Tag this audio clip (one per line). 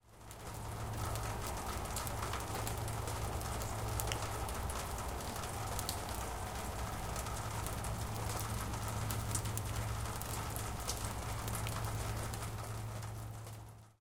ambiance
ambience
ambient
atmosphere
concrete
field-recording
garage
garage-door
gloomy
gloomy-weather
gray
grey
nature
outdoors
outside
rain
raining
rain-on-concrete
rainy
soundscape
water
weather
wet